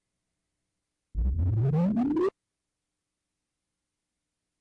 A distorted building sound.
build, distorted, dub, electronic, hip, hop, mpc, music, odd, production, snickerdoodle